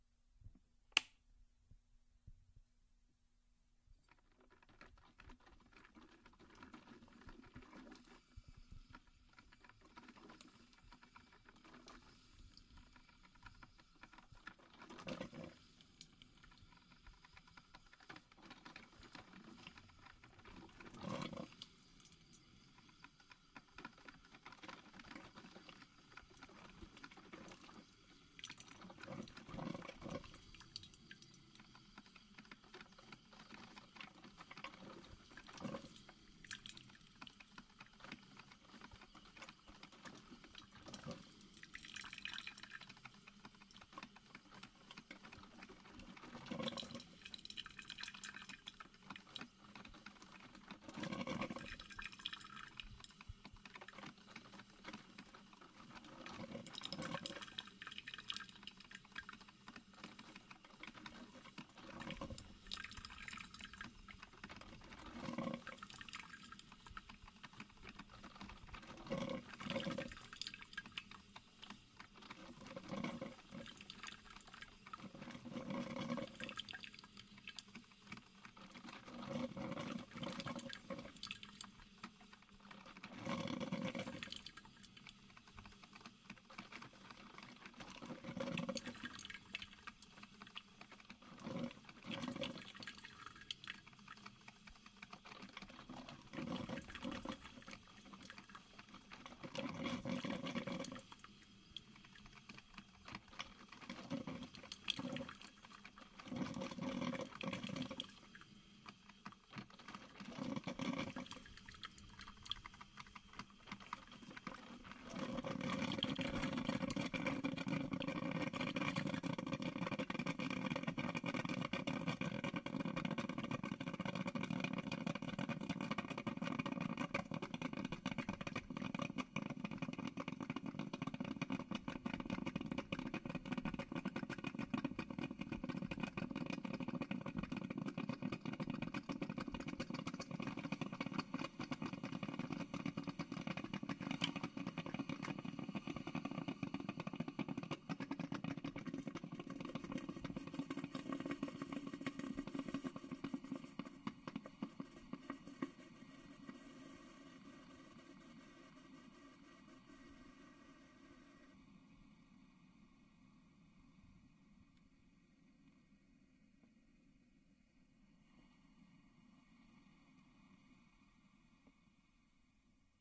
A coffee maker brewing.
brewing, water, maker, steam